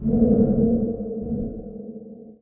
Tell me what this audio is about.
This sample was generated by blowing onto a web mic with the resulting sound low pass filtered and put through a deep reverb plug-in. The recording and sound processing was done using Ableton Live 7.
alien-breath, breathing, deep